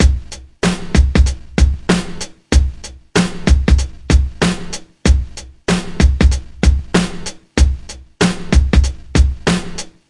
hiphop
beat rework with slicex (vst) + a snare additionel and recorded with edison vst in loop for sampler ...